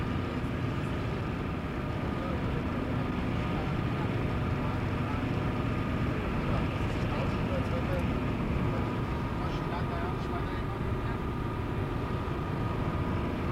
inside a car driving on german highway
Inside of a car that rolls on a german highway.
Recorded in december 2006 with an telefunken magnetophon 300.
inside, car